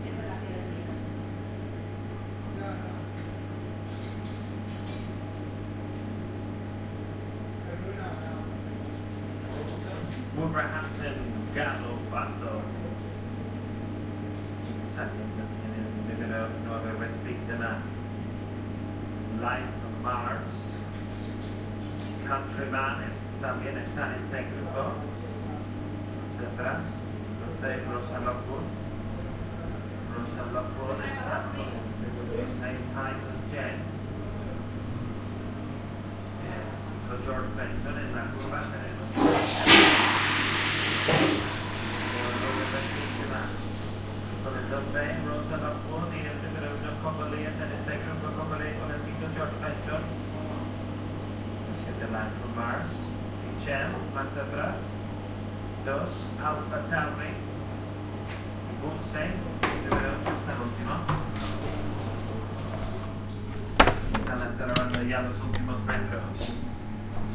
aseos sala juegos 1
Interior bathroom of a gameroom. Sounds flush and typical sounds of bets